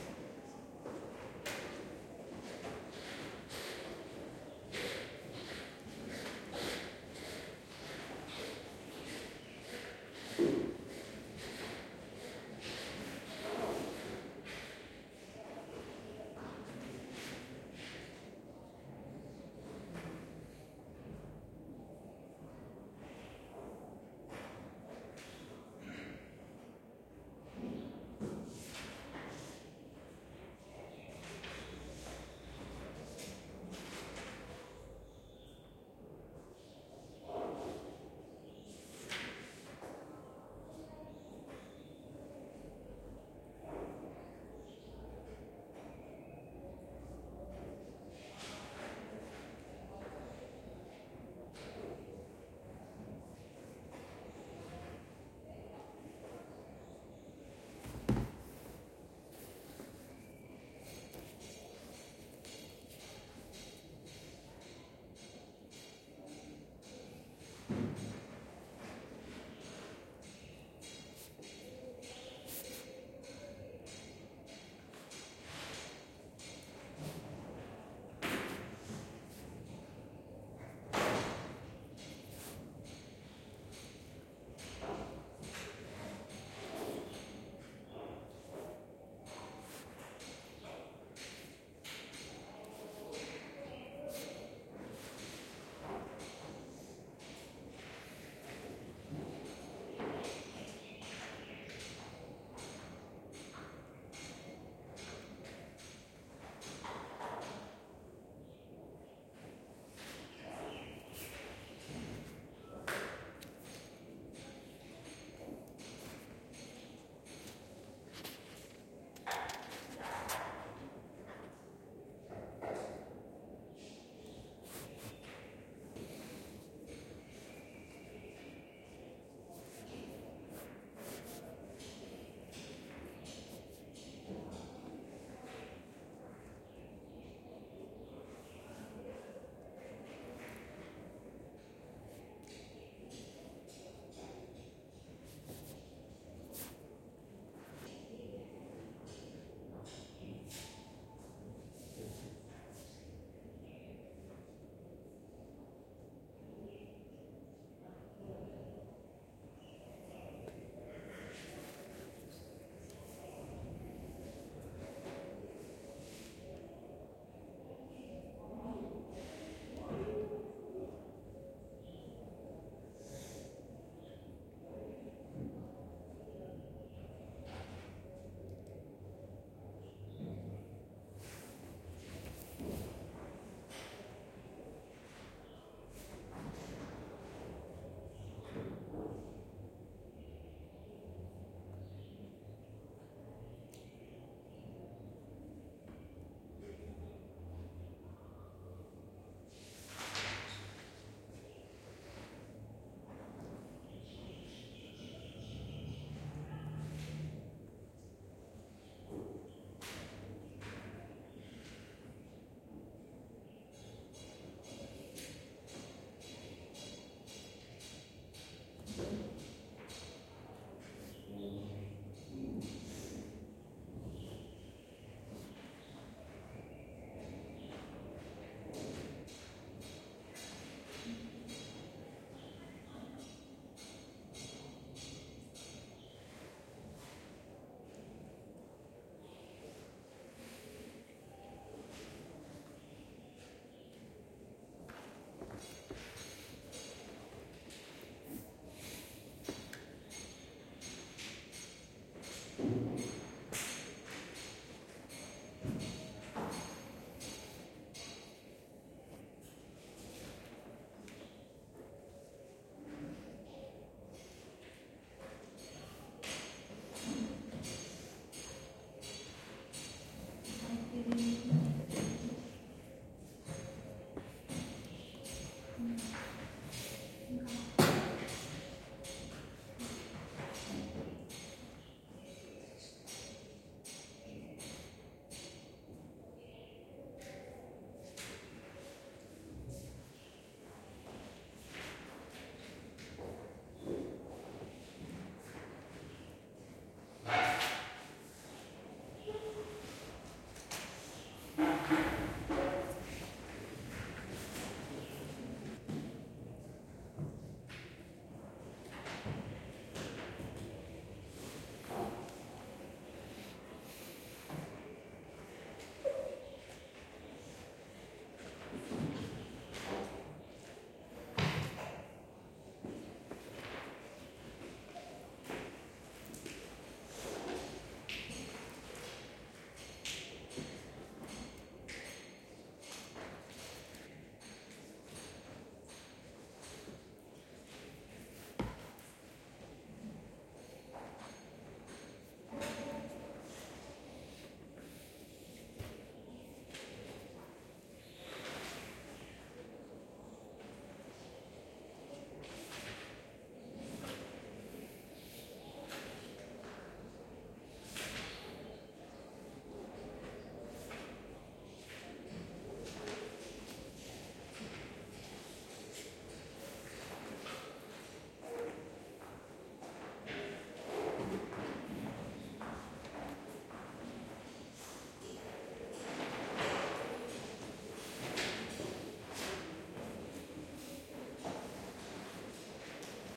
This is the sound from library of Hindu college, University of Delhi. It has sounds like paper flipping, whisperings and also small sound of a construction work going on in nearby building.
people, sound, library, college-library, field-recording, voice, atmosphere, speech, university, ambience, Hindu-College